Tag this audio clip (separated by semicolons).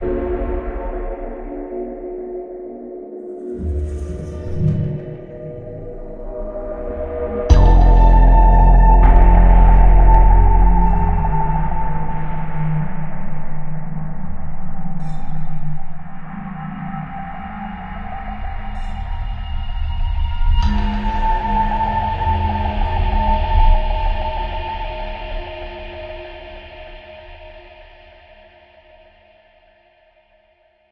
ambiance; ambience; ambient; background; background-sound; clicks; general-noise; Logo; logos; menu; reversed; synth; tone; white-noise